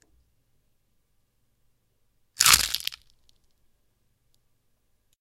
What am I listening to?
Cracking Eggshell fast, sounds like breaking bones.